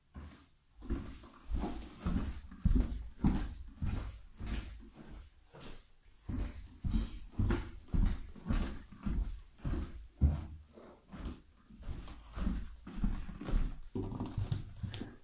Steps on wood.
steps, Wood